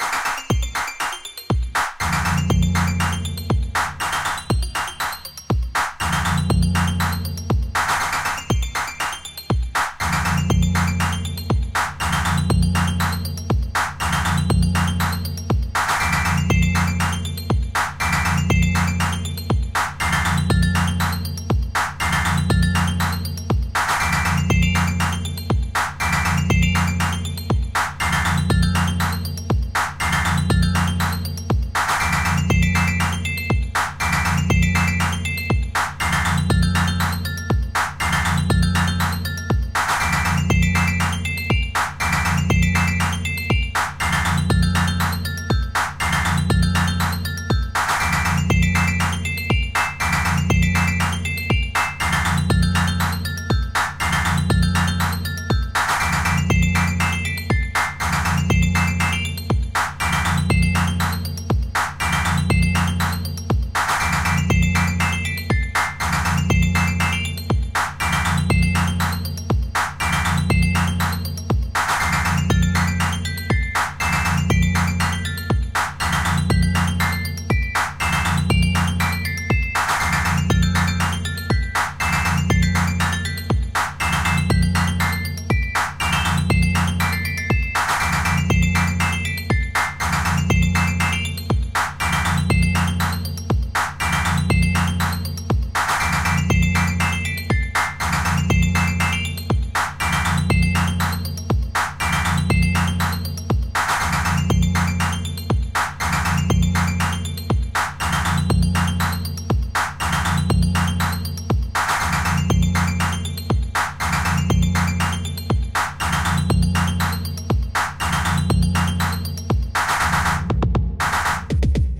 Different game loop for level 5 'ภูเขาไฟหิมะ' Hỏa Tuyết Sơn of game Đôn Hổ (I not like first loop). Create use Garageband and World Music Jam Pak. 2021.01.24 11:14
Combine with part 2 for complete loop for RGP game: